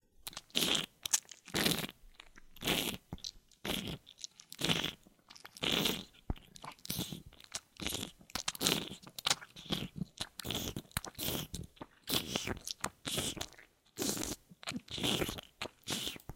Creature Eat etc.(02) 6/14
Eat, Creature, Growl, Fantasy